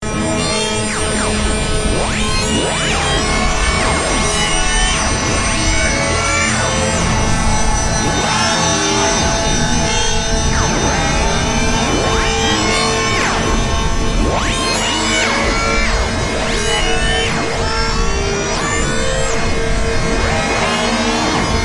Harmonic Frequency Modulation with added FX.